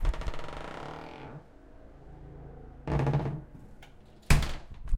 ns doorSlamInside
A creaky front door - slammed closed - recorded from inside